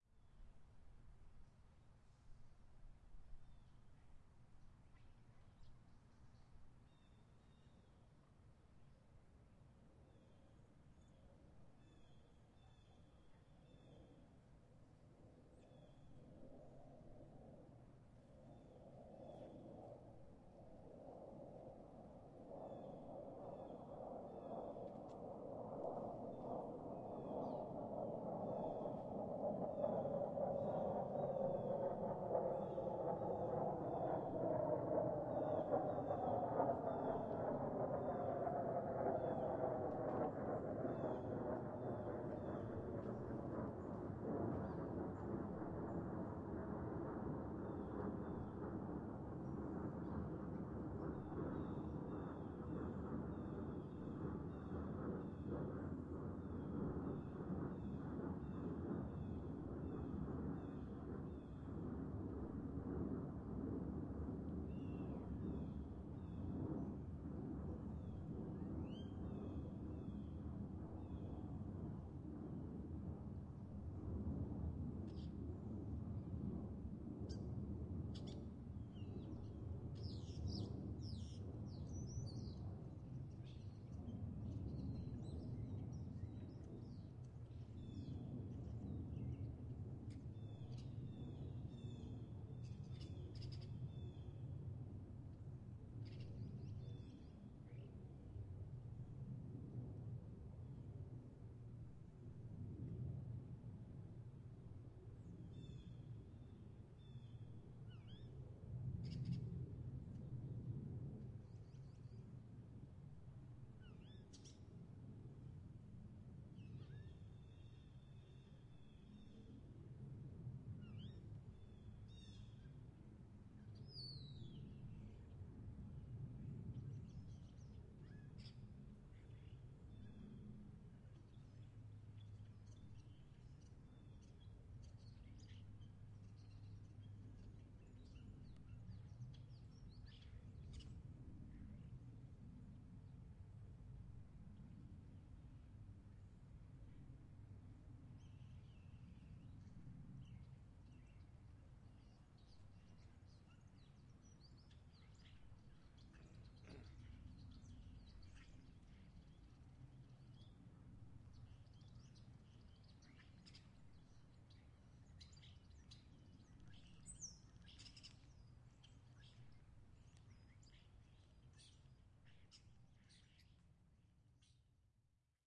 Literally a field recording. Marshland field with a long plane pass. This is the front pair of a 4channel recording made on an H2.